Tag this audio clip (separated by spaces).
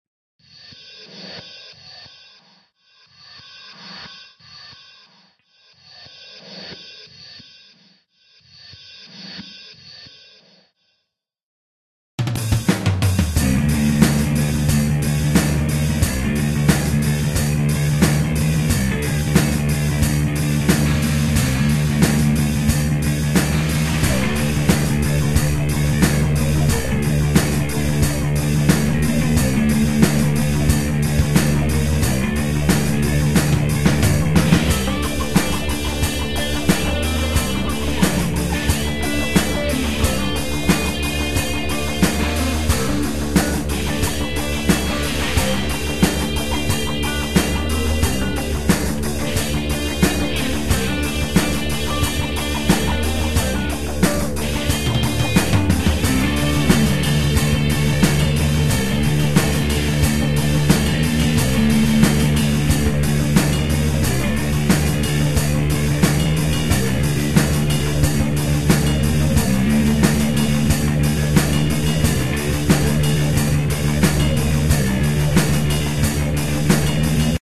alternative heavy-metal industrial